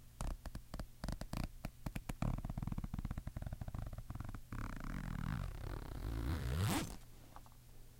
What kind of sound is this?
moving a zipper slowly
MTC500-M002-s14, pull, zipper